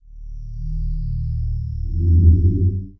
msft vs goog v8
Sonified stock prices of Microsoft competing with Google. Algorithmic composition / sound design sketch. Ominous. Microsoft is the low frequency and Google the higher.
moan, spectral, sonification, ominous, csound